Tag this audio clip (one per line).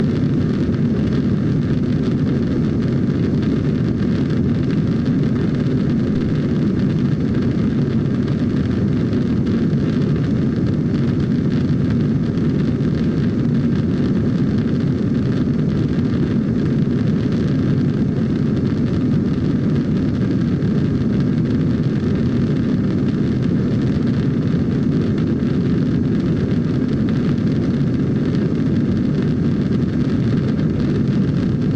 Heavy
Rocketship-Engine
Steady